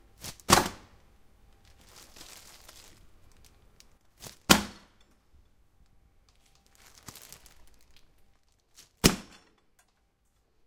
Dropping Bag of Bread
Dropping a loaf of bread (actually, it's Turkish pita bread or whatever the proper translation is) in a plastic bag.
Recorded with Zoom H2. Edited with Audacity.
bread, damage, drop, dropping, fall, falling, food, item, object